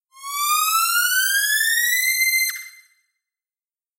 Synth Gliss, B
A very quick and dirty octave glissando made using a synthesizer as requested. This version has a chorus effect added to it.
An example of how you might credit is by putting this in the description/credits:
Originally created on 7th December 2016 using the "Massive" synthesizer and Cubase.
alarm, chorus, glissando, synth, synthesiser, synthesizer